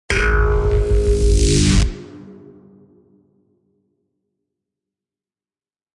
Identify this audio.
Lazer Pluck 5
Lazer sound synthesized using a short transient sample and filtered delay feedback, distortion, and a touch of reverb.
buzz, Laser, beam, Lazer, alien, synth, sci-fi, spaceship, monster, synthesizer, zap